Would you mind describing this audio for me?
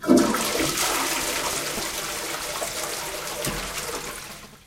You can hear how someone pulls chain toilet.